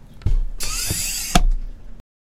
Desk Chair Piston
Recorded 11mins before upload, created for a college project, the sound of a deskchair lowering.
Hope you find a use for it!
chair, piston